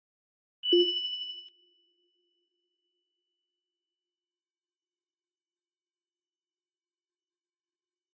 beep chime. recorded and edited with logic synth plug ins.
sound, beep, chime, computer